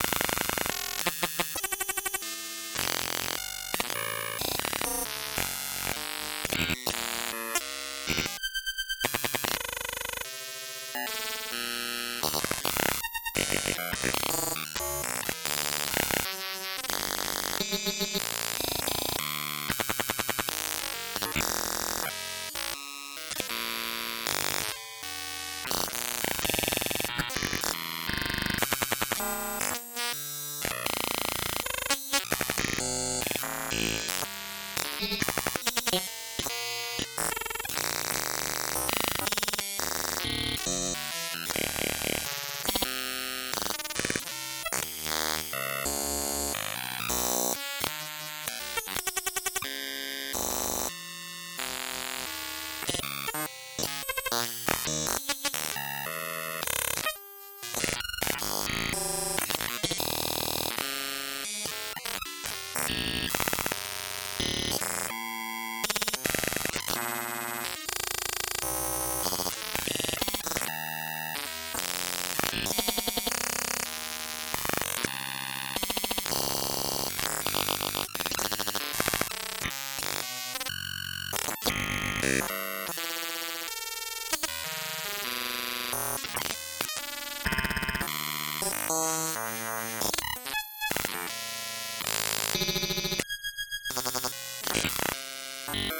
generated with surge synthesizer and a random note generator
thanks for listening to this sound, number 527300